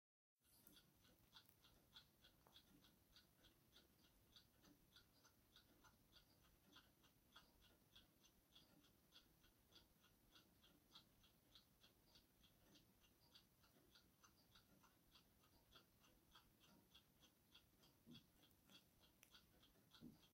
Multiple clocks ticking
ambience, clocks, quiet, room, Ticking